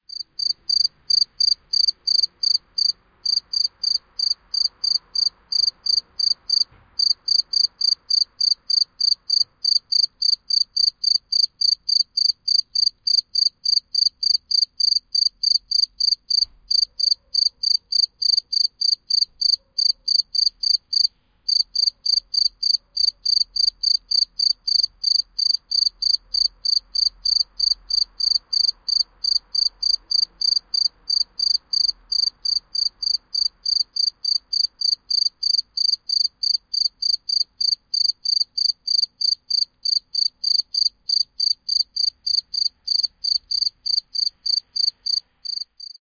sound of a cricket